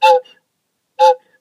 toy train toot
A toy train (Selcol Hoot-N-Tootin Loco) being squeezed.